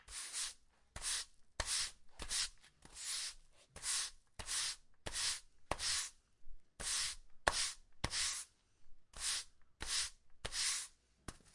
Sweeping a concrete floor with a push broom